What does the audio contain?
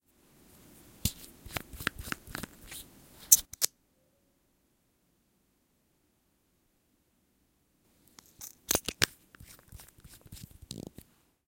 Recording of the opening of a lip gloss.